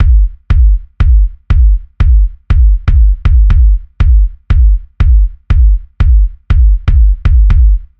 Silene Drums 120 01
Electronic rock rhythm soft distorted and compressed
beat, drum, loop